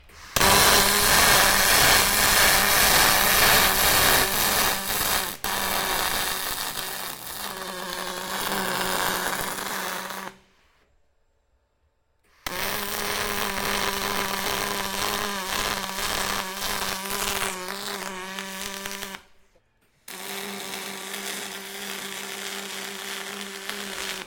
Aluminium Welder from 3 Positions
An aluminium welder recorded in action from 3 different positions - approx 30cm, 1m & 2.5m distance.
Alex Fitzwater/needle media 2017
aluminium, burn, effect, electric, foley, hardware, industrial, metal, sfx, shed, shock, short-circuit, sound, tase, taser, tool, tools, weld, welder, welding, work, workshop